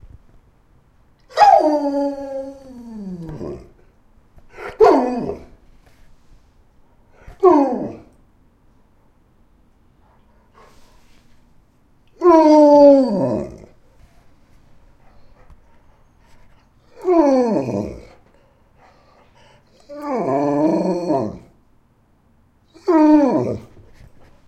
A recording of my Alaskan Malamute, Igor, while he is waiting for his dinner. Malamutes are known for their evocative vocal ability. Recorded with a Zoom H2 in my kitchen.